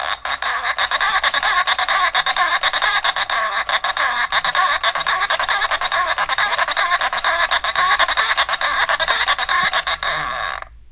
fpphone st rollclose 3
Toy phone makes squawking sound as it rolls along, recorded with mics attached to toy. Fairly consistent medium speed.
stereo, toy